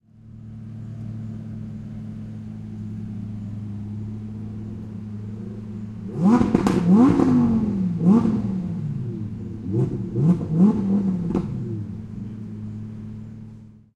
Supercar rev
Car idles, then revs for no other reason but to make a noise. Can't remember what type of car it was, but it was blue and little flames came out of the exhaust.
Tascam DR-22WL, internal mics, deadcat. 15Hz high pass filter.
banging, car, engine, exhaust, noise, popping, rev, revving, sound, supercar